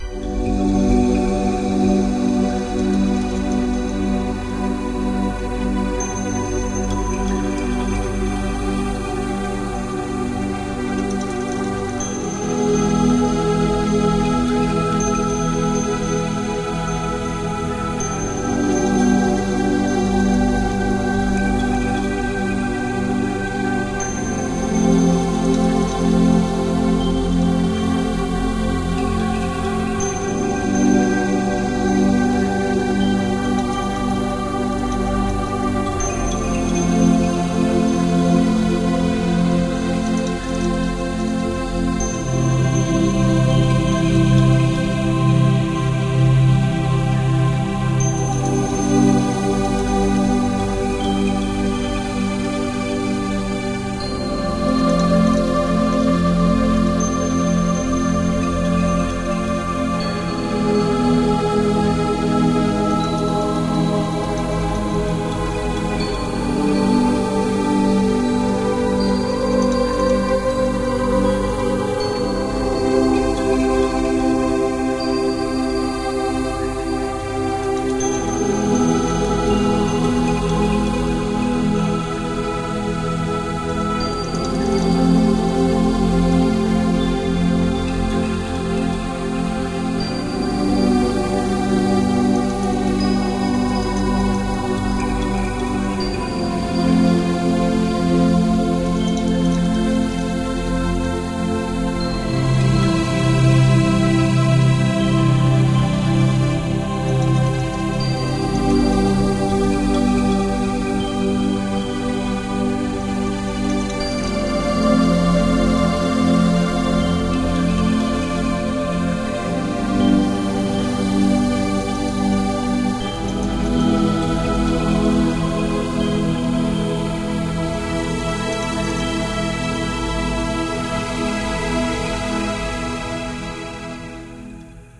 Mysteric Milkyway
I think I caught a sound that represents a space documentary, the mysteries of the universe, which is both comforting and a little chilling. Enjoy your meal.